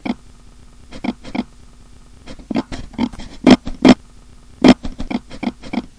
Oink from a faked pig. The sounds are done by cutting thick paper with a very sharp and big scissor.
animals, faked, natural, synthesized